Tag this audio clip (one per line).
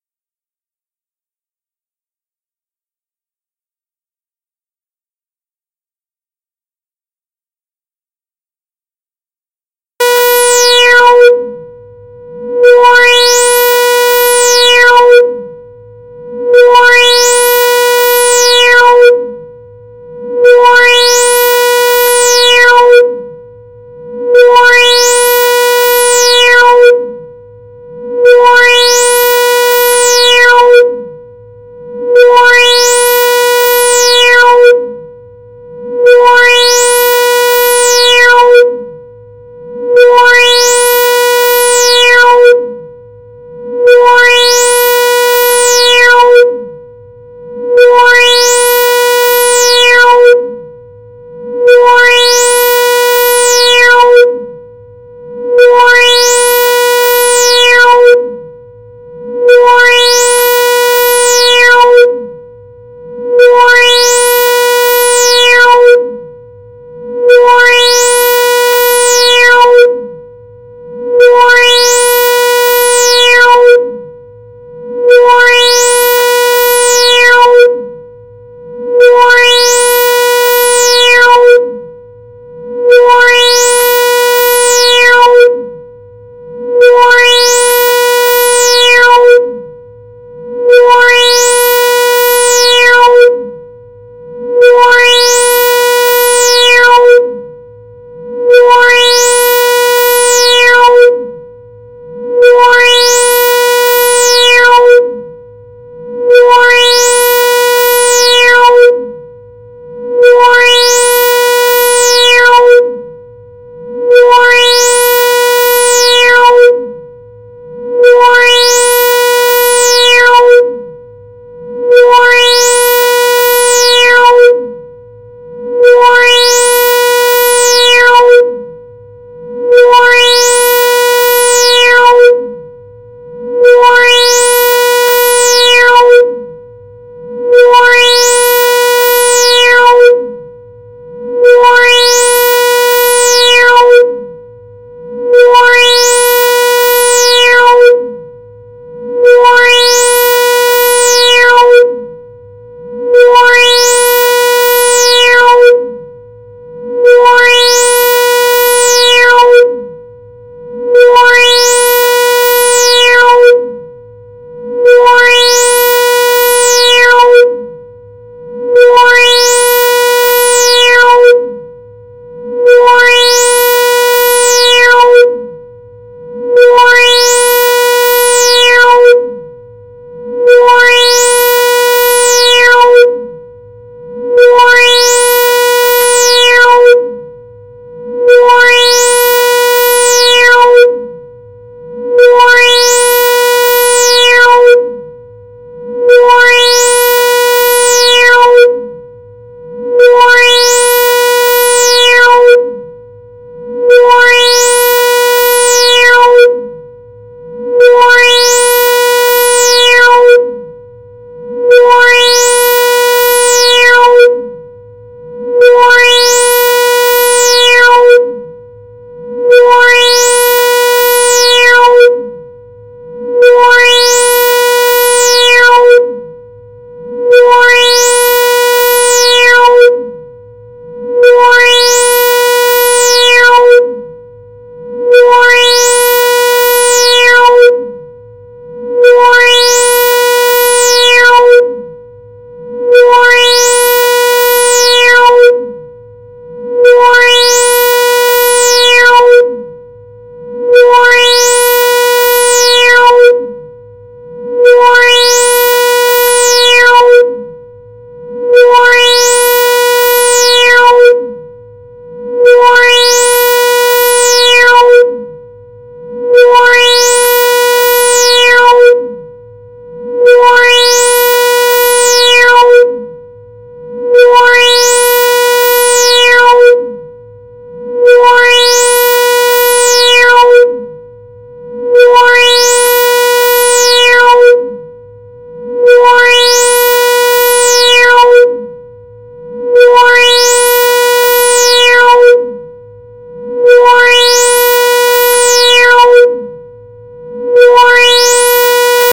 annoying
bee
buzz
fly
oscillating